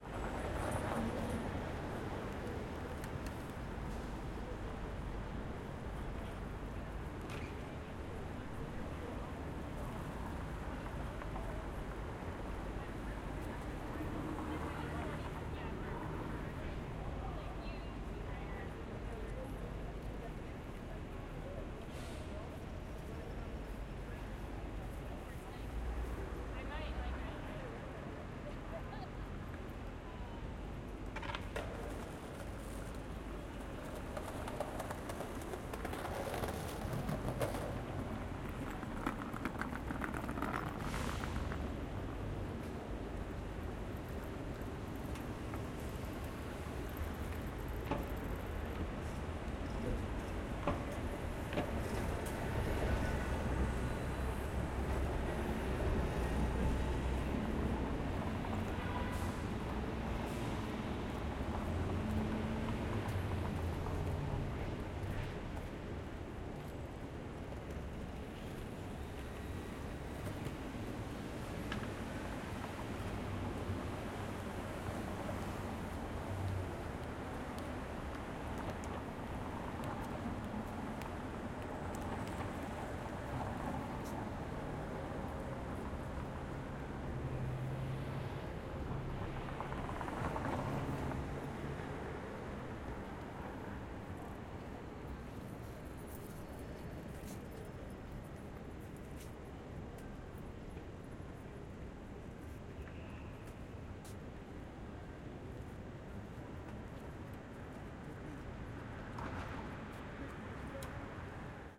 LA Streets 5th and Broadway Evening 2-EDIT
Recorded in Los Angeles, Fall 2019.
Light traffic. Buses. Indistinct voices. Pedestrians. Skateboarders. Honks.
Street, AudioDramaHub, Los-Angeles, City, field-recording, traffic